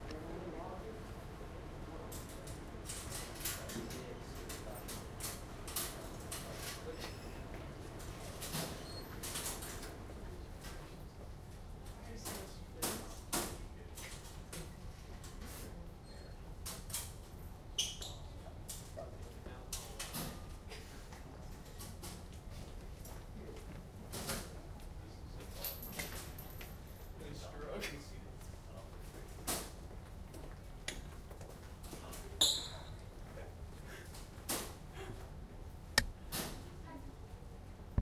Sounds of lockers being opened and closed with some conversation and sneaker squeaking in a high school hallway.